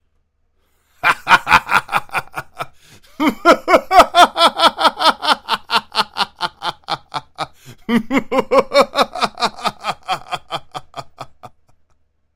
Maniacal Laugh 2
Varying Maniacal Laughter